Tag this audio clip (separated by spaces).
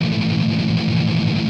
a muted distortion 160bpm guitar power-chord drop-d les-paul strumming loop